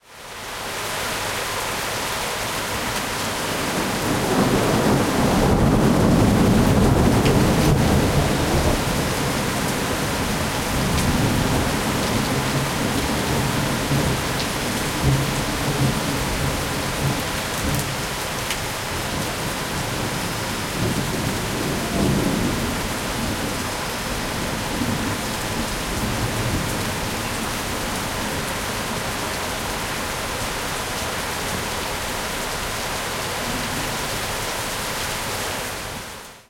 rain thunder rumbling
rain, rumbling, thunder